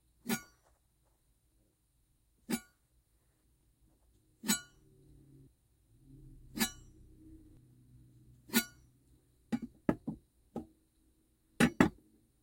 Some metal "shing" sounds, great for picking up swords or large knives. Created with a large, sharp chef's knife and a wooden cutting board. Recorded at close range in a relatively anechoic closet.
sword, sharpen, ring, steel, shing, sheath, draw, scrape, knife